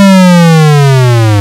Centipede Flea Drop sound
A synthesized remake of the falling sound of a flea in the old Centipede arcade game.
centipede, flea, slide, drop, arcade, whistle, whoop